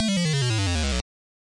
Arcade Pitched Down
Arcade Sound FX.
8bit, school